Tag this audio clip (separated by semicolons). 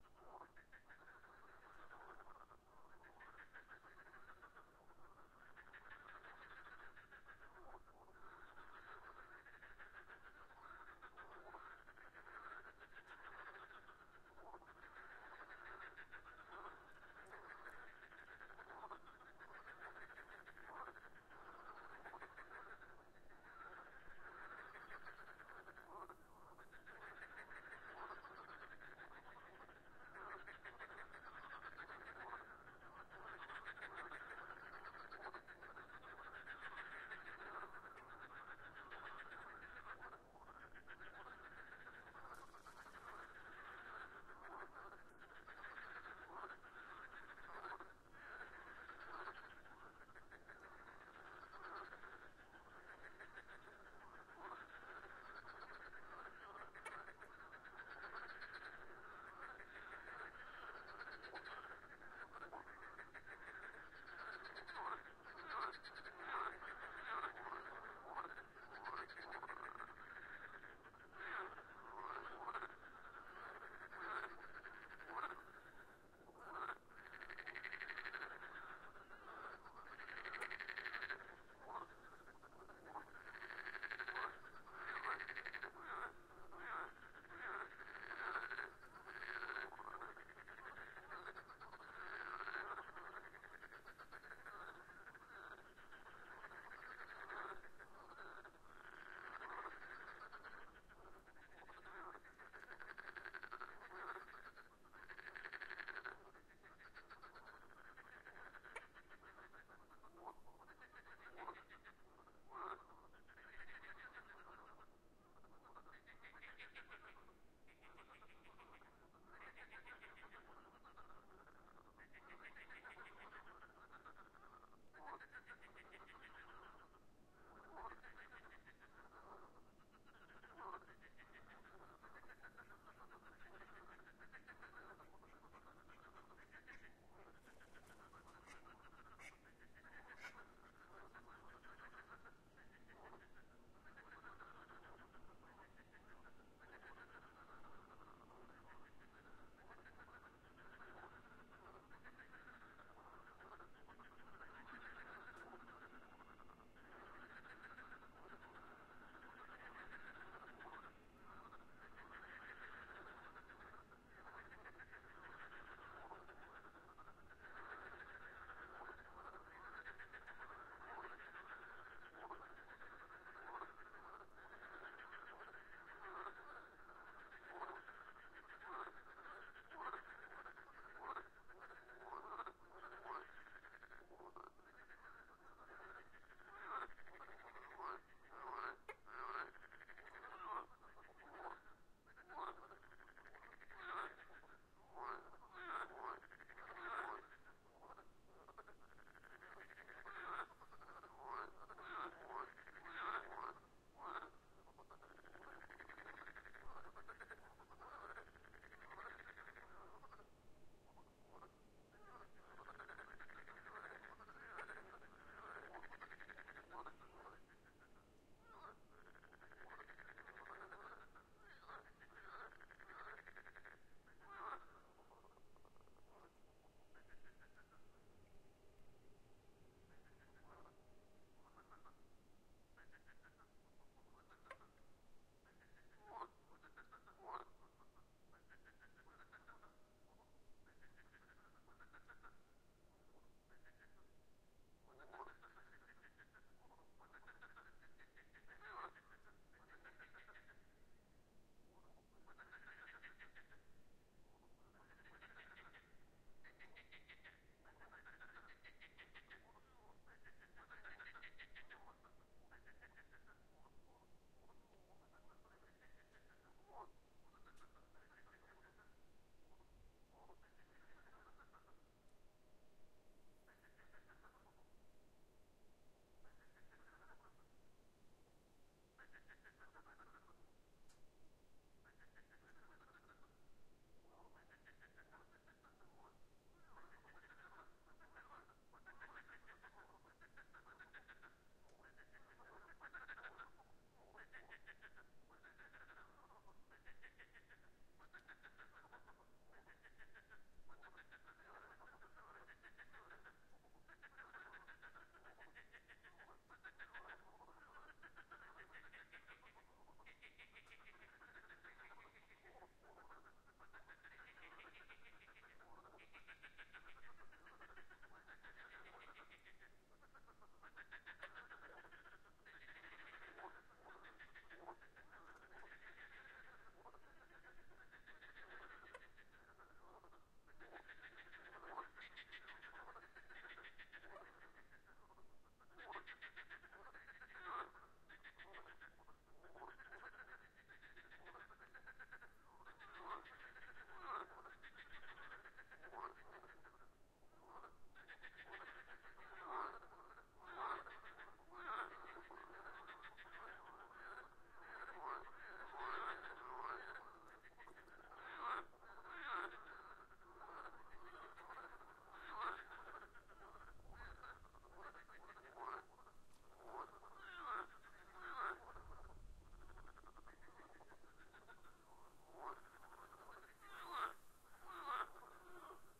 frog; ambience; nature; animal; lakeside